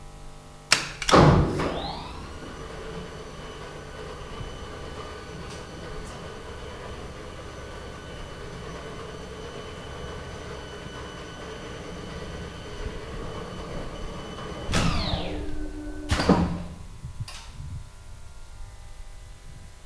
Well it was hard to get this sound. I stole the elevator machine room key ^^. So this is a circa 20-25 years old, heavy, out of date elevator motor, recorded in the closed area on the roof of the building. The Elevator starts and moves probably 5-6 levels then stops. You can also hear automatic toggles, relays, elevator motor-curl and some transformer noise in the background.
old-elevator, start